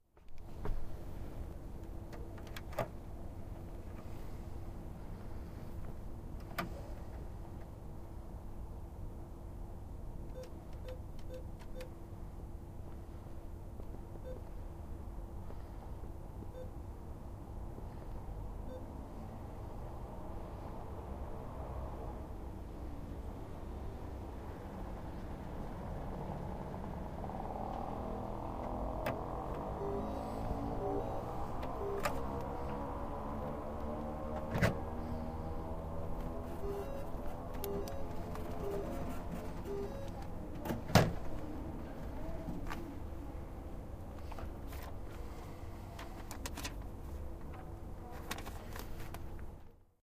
Taking cash from the cashmachine. A moped is passing as well as a woman on her bike. She is talking to her cellphone. I never want a receipt but this time I took one so you can hear that being printed. Recorded with my new Edirol R-09 in the inside pocket of my jacket.
breath, cashpoint, paper, street